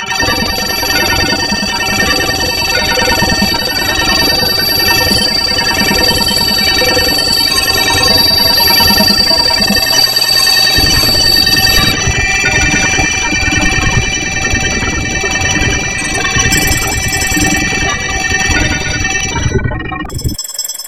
This one is a brain shrinker. It will make both you as pod owner and people near you mad.